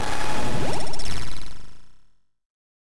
Troy's racket pong
Video game sound by Troy Hanson
8-bit, arcade, chip, lo-fi, retro, video-game